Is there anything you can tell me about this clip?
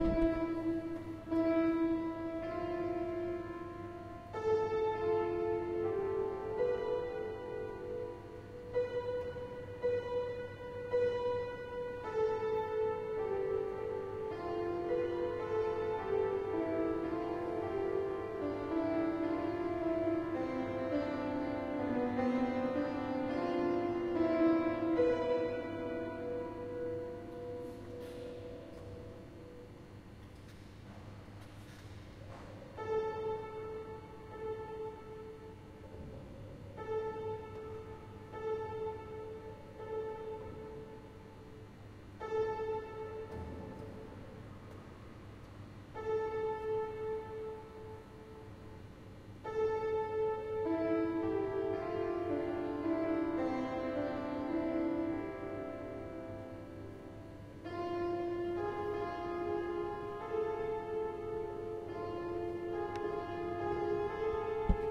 Homeless piano 1
Field-recording of a homeless man playing some piano in central station hall at Leeuwarden trainstation, Netherlands.
central, hall, hobo, homeless, piano, station, wanderer